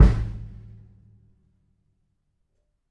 Individual percussive hits recorded live from my Tama Drum Kit